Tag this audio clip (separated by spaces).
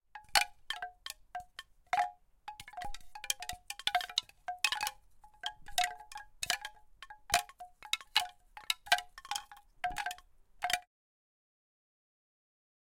CZ Czech Pansk Panska